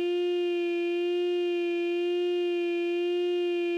The vowel “E" ordered within a standard scale of one octave starting with root.
e, formant, speech, supercollider, voice, vowel